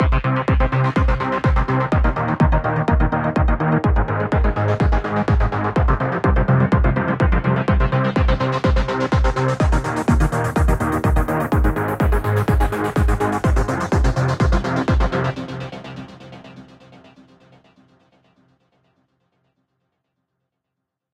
Tisserand-EuroAmbienceBackground
A euro disco loop.
ambience, disco, instrumental, jingle, loop, movie